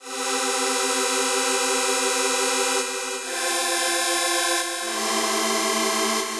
Epic Future Bass Chords
Some future bass chords for a track im working on.
loop, melodic, edm, dance, ambient, future-bass, bass, synth, euphoric, chords, electronic, electro